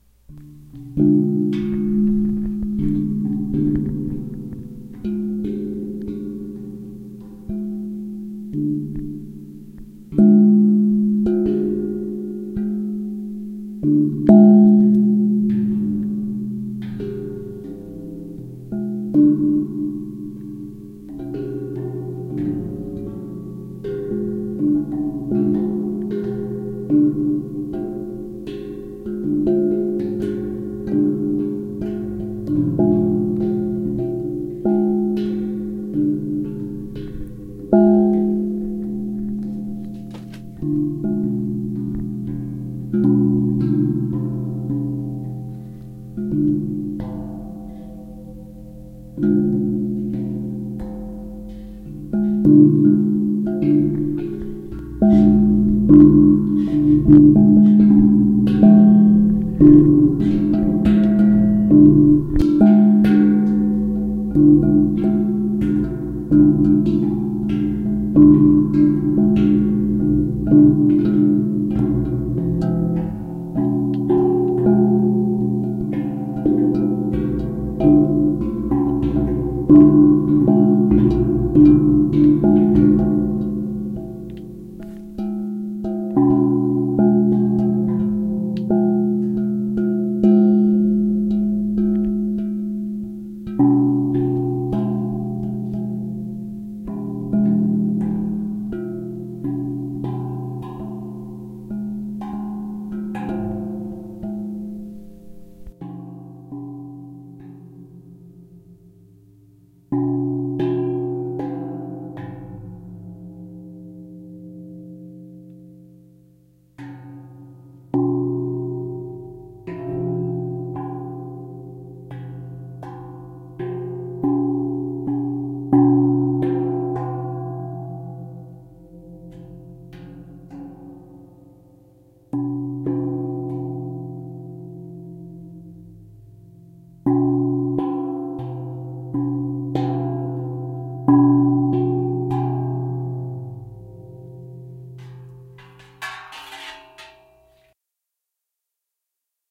Samples gongs0
3 gongs rexorded by themselves. Then I layered the lines....
himalayan, gongs, 3, resonance, indonesian, vibration